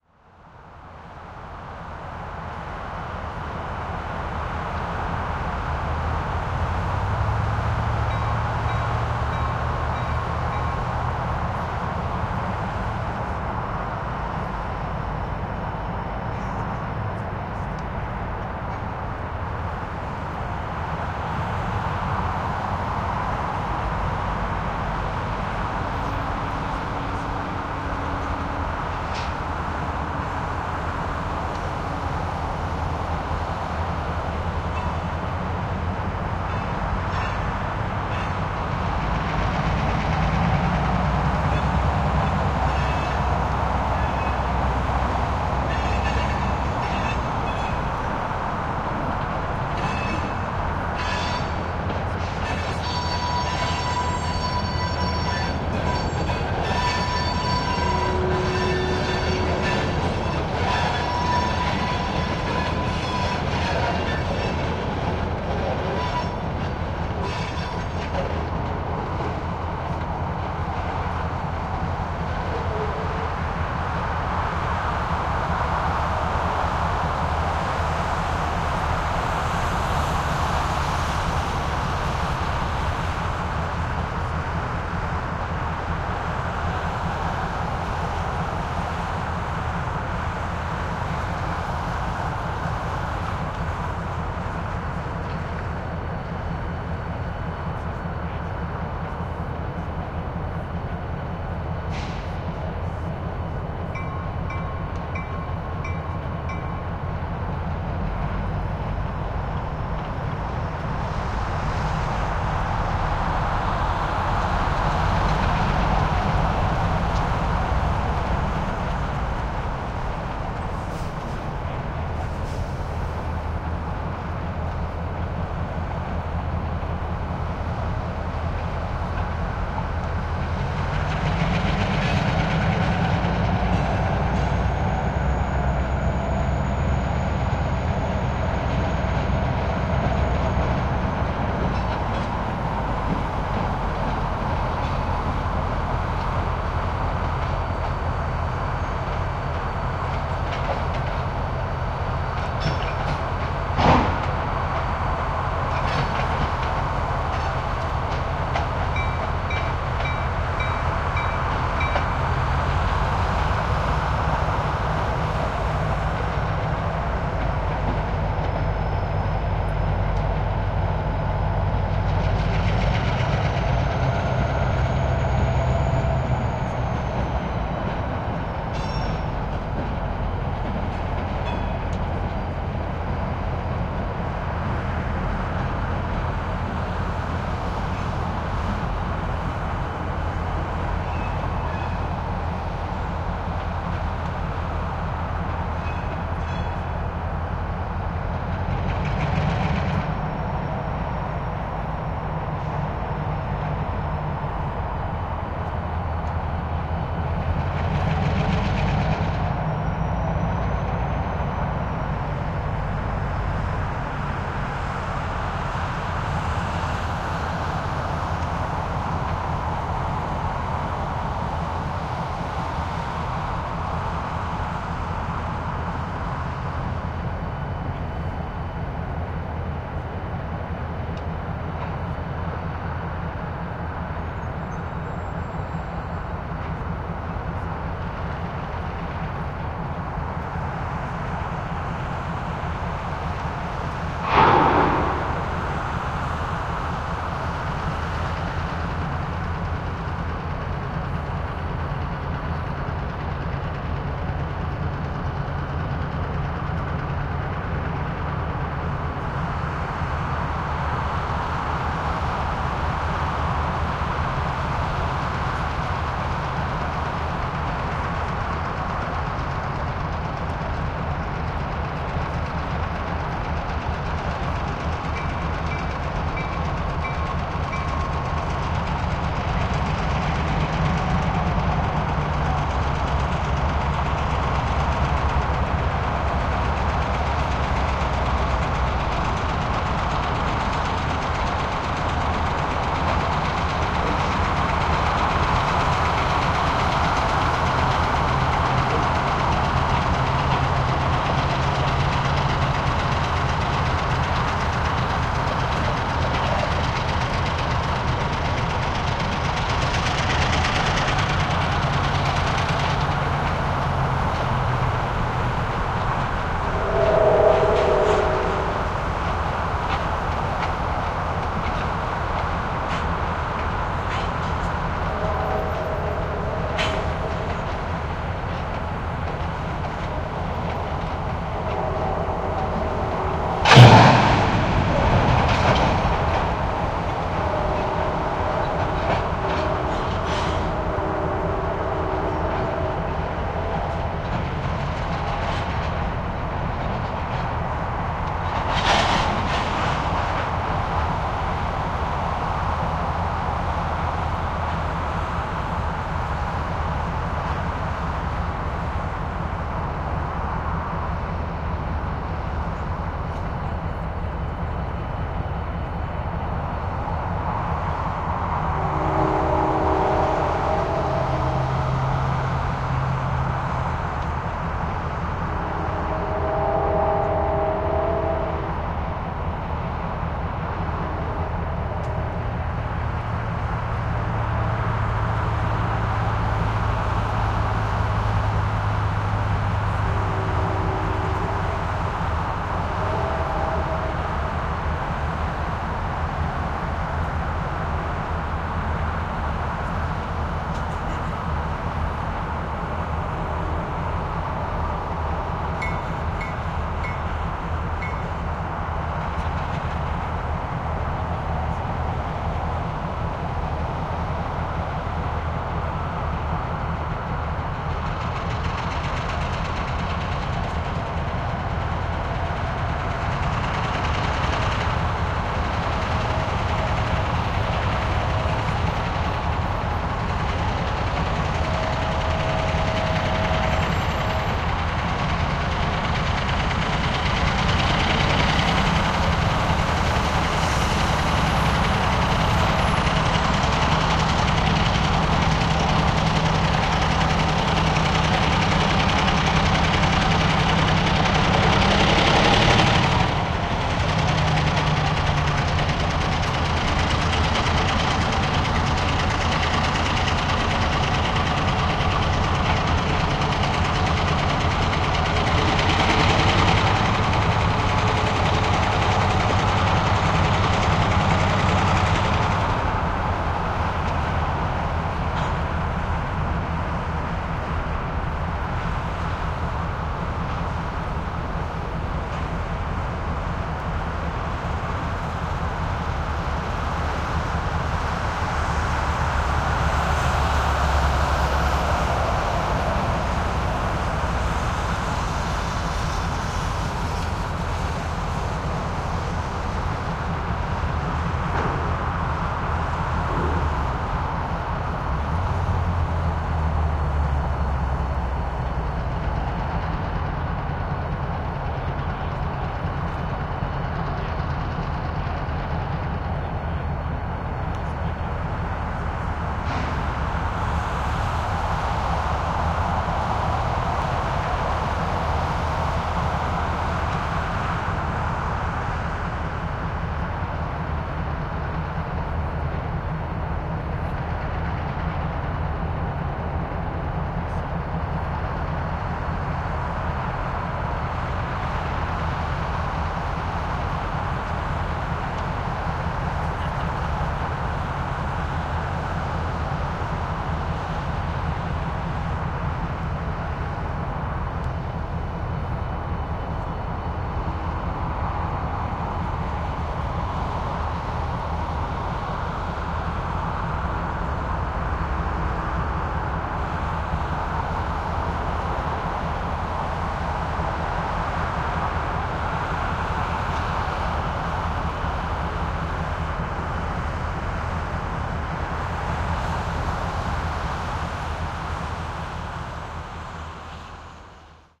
01 Skidmore Bluffs 1:14:2008
Train yard at night, cars being organized onto various tracks. some crashes, whistles engine sounds. There's a highway between the yard and the recorder, so there's a pretty constant noise floor. Recorded @ about... 400m with built ins on a Sony D50.